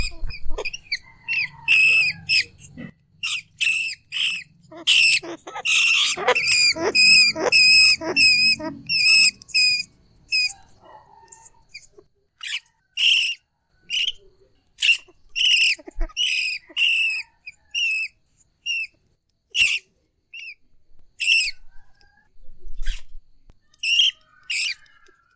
Sound rat capture in trap and free in Khu Cộng Nghiệp. Record use Zoom H4n Pro 2019.10.29 05:30